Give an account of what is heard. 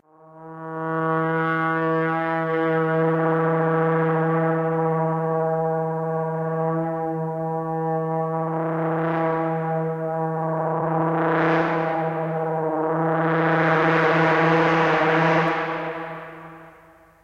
trumpet frullato
trumpet processed samples
frullato, transformation, trumpet